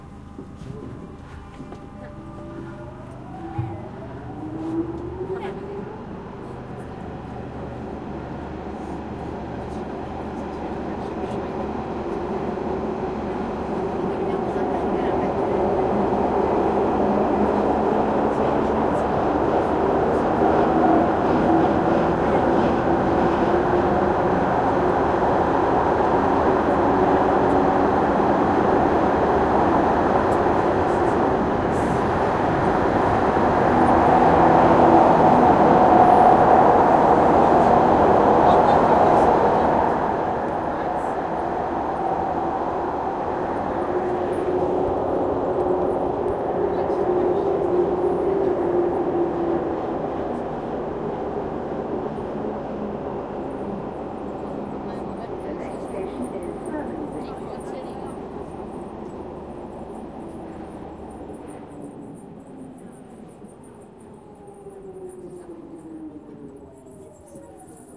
Recording made on 17th feb 2013, with Zoom H4n X/y 120º integrated mics.
Hi-pass filtered @ 80Hz. No more processing
Interior from london underground tram.